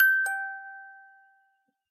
clean sol sol 1
eliasheunincks musicbox-samplepack, i just cleaned it. sounds less organic now.